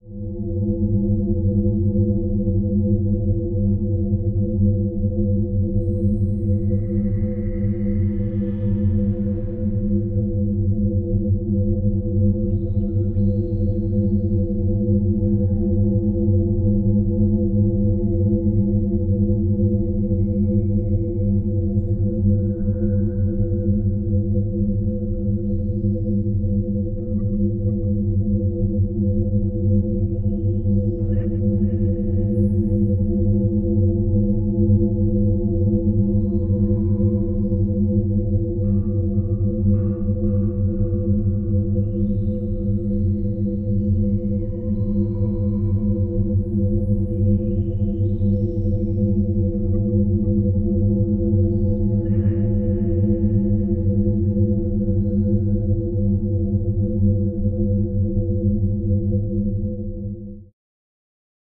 Space Hulk
This drone conveys the atmosphere aboard an abandoned space ship. It is still running but appears empty - or is it? This was recorded in Reaper with some treated "found sound" (a metal container being rubbed, slowed down) and additional noises from three instances of Korg Legacy Wavestation VSTi software synthesiser.
Ambient, Atmosphere, Dark, Drone, Horror, Scifi